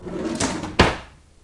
drawer close 3
Sliding the cutlery drawer closed
cutlery, drawer, kitchen